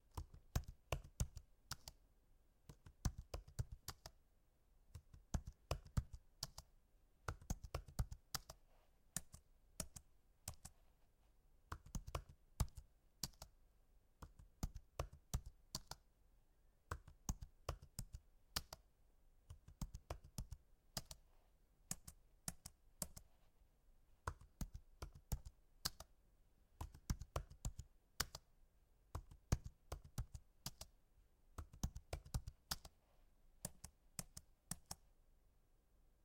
typewriting slow
Writing on a keyboard, slow tempo